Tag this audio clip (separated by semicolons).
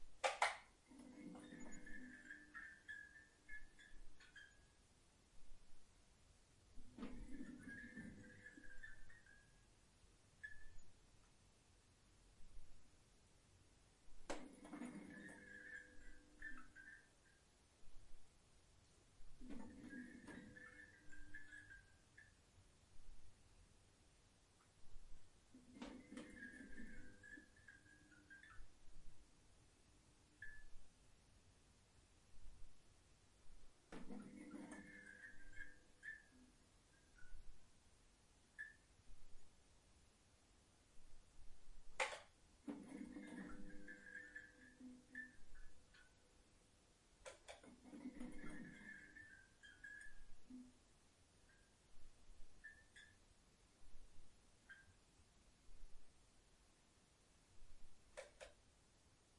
ambience; creepy; far; flickering; H6; horror; lights; neon; studio; switched; tv; xy